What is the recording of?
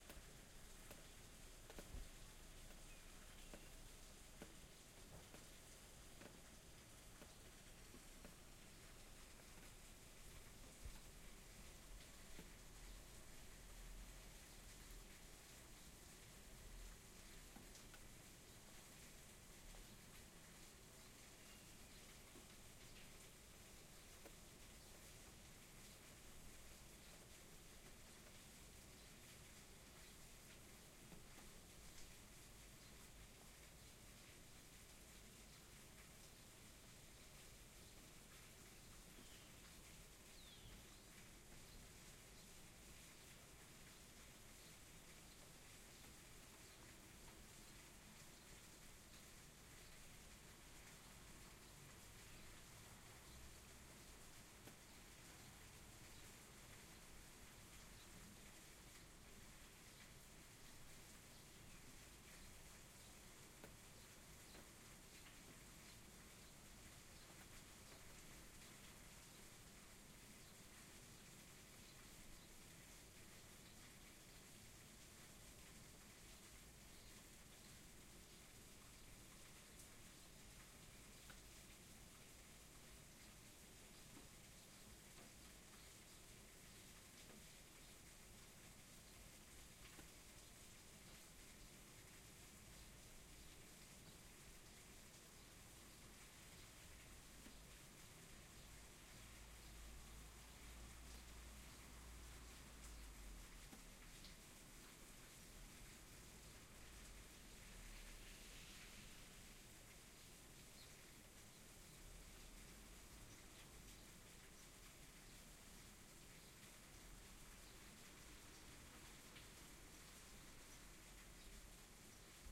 RAIN AND BIRDS
Wildtrack of a rainy day with some birds
birds, field-recording, rain, wildtrack